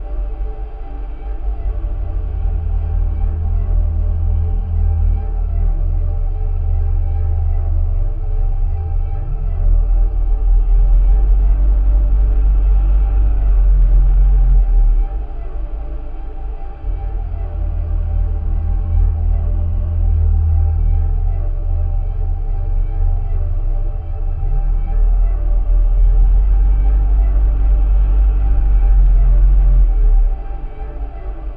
One in a small series of sounds that began as me making vocal sounds into a mic and making lots of layers and pitching and slowing and speeding the layers. In some of the sounds there are some glitchy rhythmic elements as well. Recorded with an AT2020 mic into an Apogee Duet and manipulated with Gleetchlab.